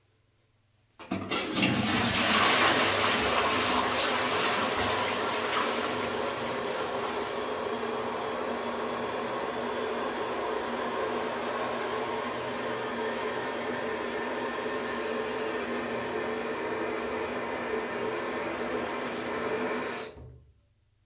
Toilet flush from pressing the button to filling of the tank.
Pair of Lom Usi microphones attached to a Zoom H5 recorder. The sound has not been altered or enhanced.